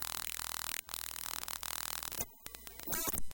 vtech circuit bend025
Produce by overdriving, short circuiting, bending and just messing up a v-tech speak and spell typed unit. Very fun easy to mangle with some really interesting results.
broken-toy, noise, digital, micro, circuit-bending, music, speak-and-spell